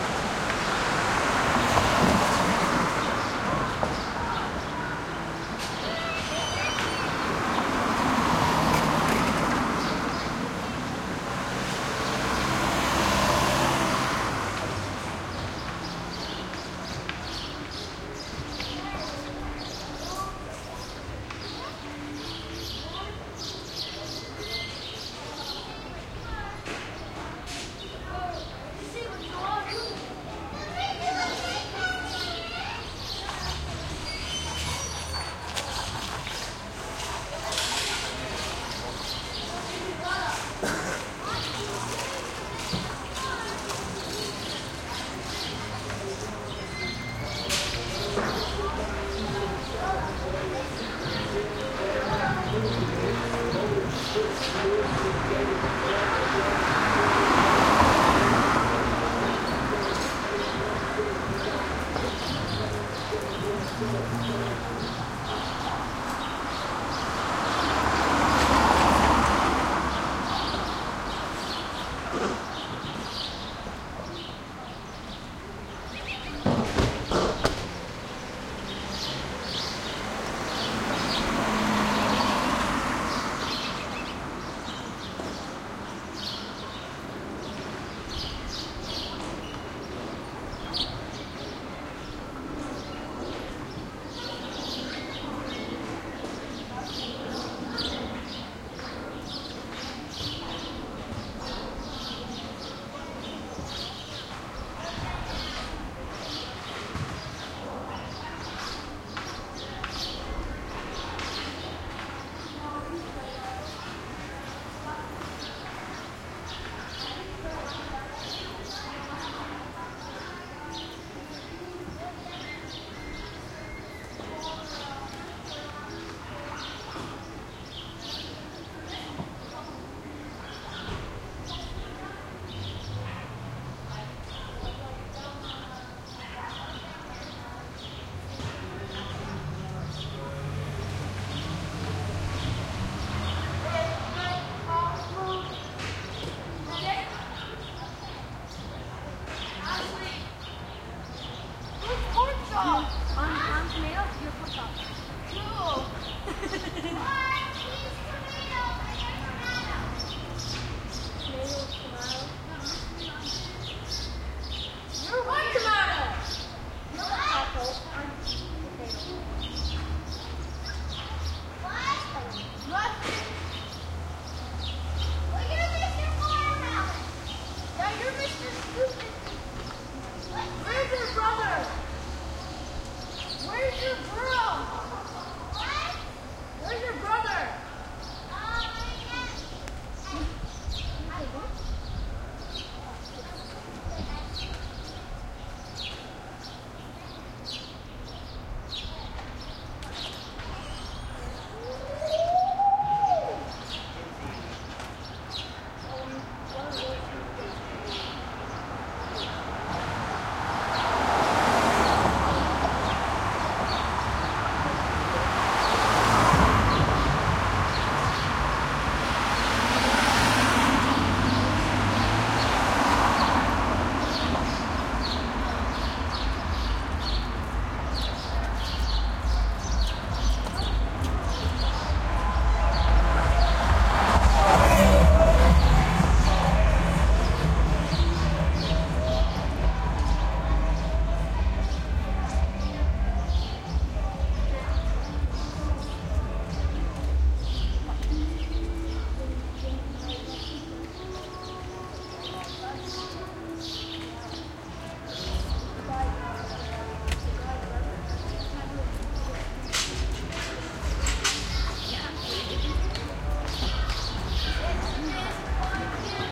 street birds cars pass real bumpy kids play shout interesting things1 faint radio music Montreal, Canada
pass, kids, birds, play, bumpy, Montreal, Canada, cars, street